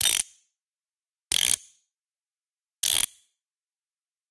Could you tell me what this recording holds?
Winding up a kitchen clock. Recorded straight to the pc, I added some reverb later.
winding-up, windup